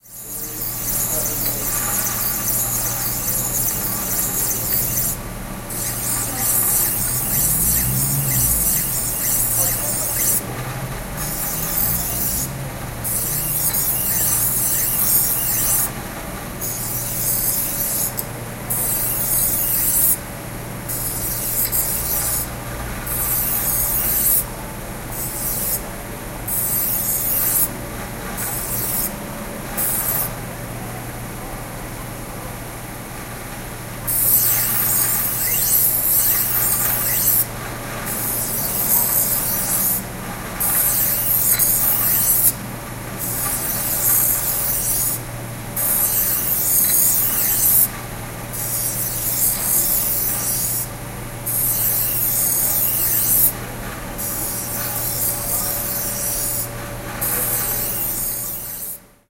Oporto Afilador Oporto 2009
Simply, this is a recording of a knife grinder's in the market of Bolhao, Porto